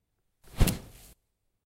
Punch 01 Bloody
attack sound fighting
attack, fighting, sound